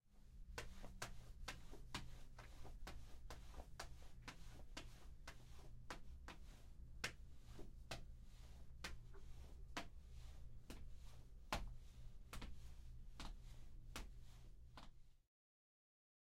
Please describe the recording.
pavement footsteps sound